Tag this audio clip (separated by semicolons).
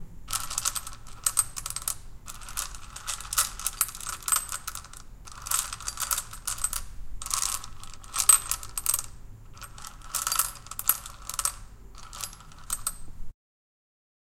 stone; OWI; movement; assortment; scatter; bowl; glass; pebbles; decoration; rolling; rocks